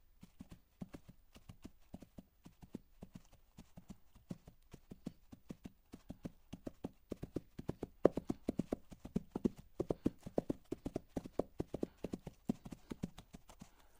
Hooves, Hard Muddy Surface / Layer 08
Microphone - Neumann U87 / Preamp - D&R / AD - MOTU
Coconut shells on a muddy, hard surface.
To be used as a part of a layer.